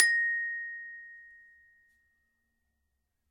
Glockenspiel 24 bb3 01
Samples of the small Glockenspiel I started out on as a child.
Have fun!
Recorded with a Zoom H5 and a Rode NT2000.
Edited in Audacity and ocenaudio.
It's always nice to hear what projects you use these sounds for.
campanelli, sample-pack, metallophone, Glockenspiel, single-note, metal, multisample, recording, one-shot, note, sample, multi-sample, percussion